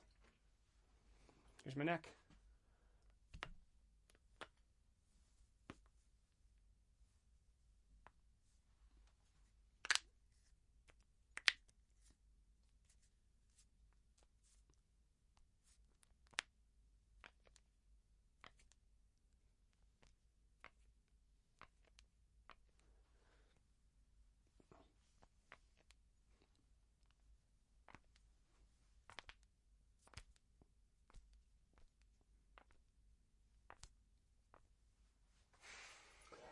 Knuckles Cracking
bones, cracking, pops